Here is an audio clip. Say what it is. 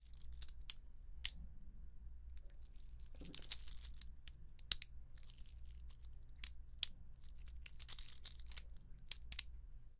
sonido de cerrojo hecho con un reloj